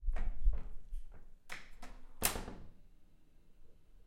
Door Closing
door, Household, Squeak, Wooden